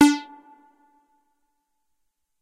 MOOG LEAD D
moog minitaur lead roland space echo
echo, lead, minitaur, moog, roland, space